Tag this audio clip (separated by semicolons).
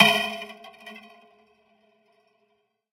metal; sound; wire; contact; drum; microphone; sfx; effect; fx; brush; close